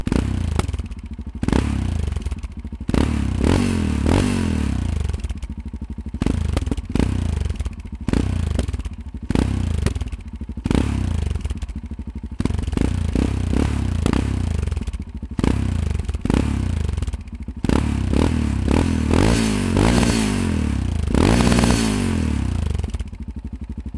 yamaha mt03 roar edhaust 2
Yamaha MT-03 2006 roaring, custom exhaust, compressed and some EQ for plenty of juice..
custom-exhaust, yamaha-mt-03, reving, roar, motorbike, engine